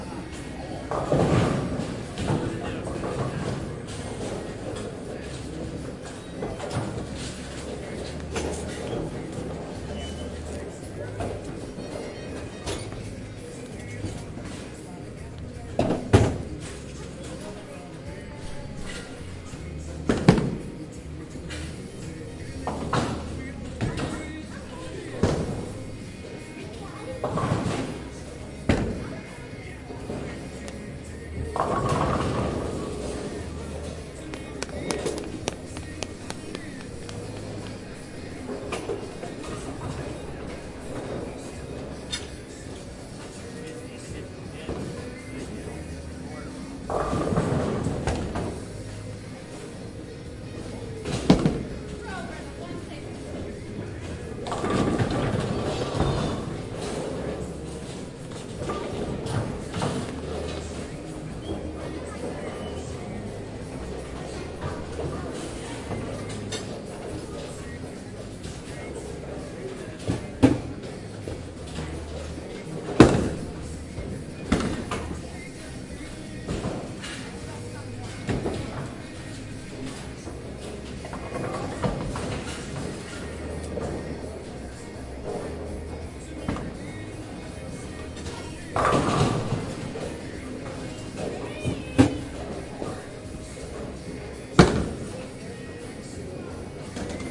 Here we have the ambient background noise of a bowling alley in North Versailles, PA on a Saturday afternoon. You will hear the sounds of pins being knocked down, bowling balls hitting the lanes, and music playing on nearby speakers. Recorded via a Zoom H4N.